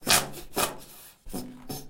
delphis EMPTY TUBE LOOP #095
EMPTY DIFFERENT TUBES WITH SOAP SHAMPOO OR JELLY
tubes, jelly, bpm, 95